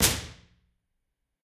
Studio B Near
Impulse response of Studio B at Middle Tennessee State University. There are 4 impulses of this room in this pack, with various microphone positions for alternate directional cues.
Ambience, Impulse, IR, Response, Reverb, Room